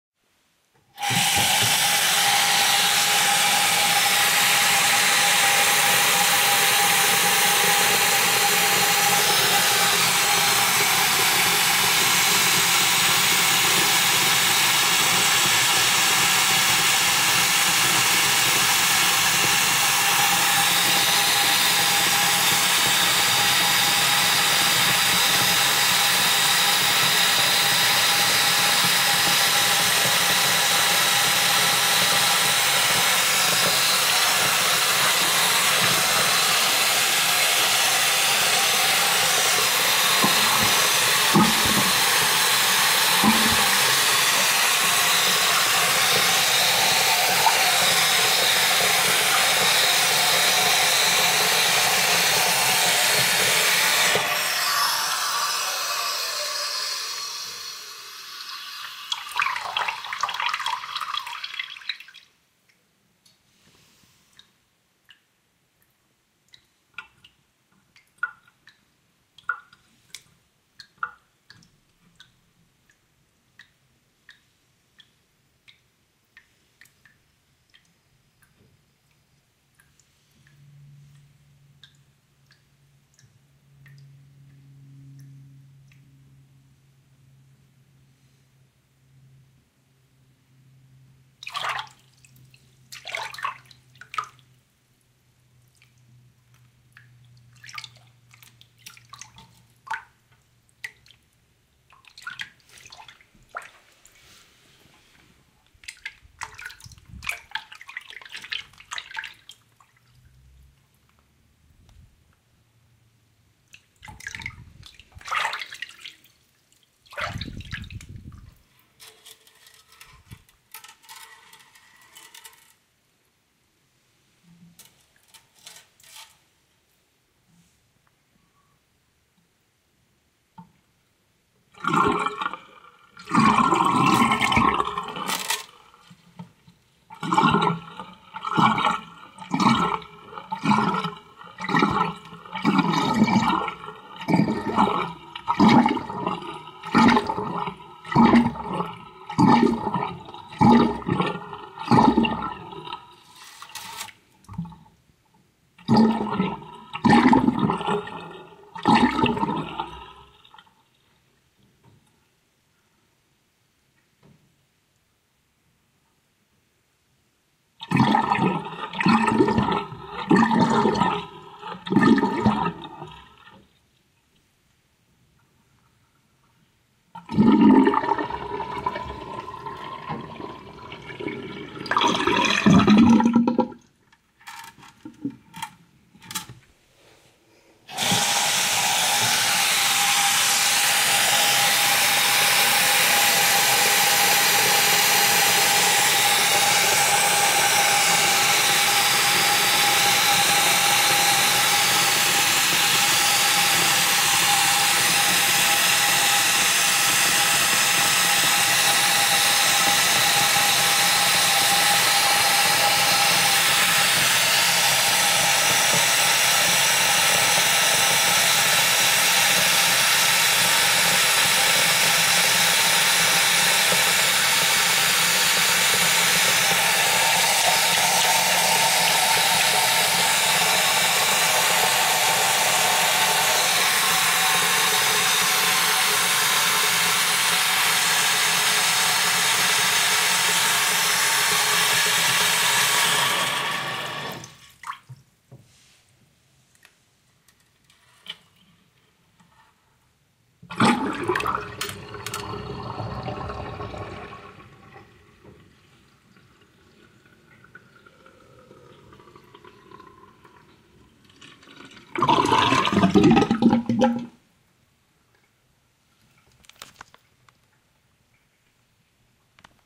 Water In Sink
I recorded this using my Sony Cyber-shot camera. All I did was just play around in the sink and got some sounds from pulling the plug a few times to get some gurgling sounds and such. hope this is useful!
bath, bathroom, bathtub, drain, faucet, gargle, glub, gurgle, plug, plughole, sink, tub, water